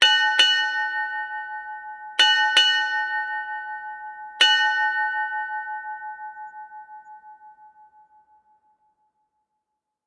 Five Bells,Ship Time
As early as the 15th Century a bell was used to sound the time on board a ship. The bell was rung every half hour of the 4 hour watch.Even numbers were in pairs, odd numbers in pairs and singles.
maritime, nautical, 5-bells, naval, ding, ships-bell, sailing, ship, time, bell, seafaring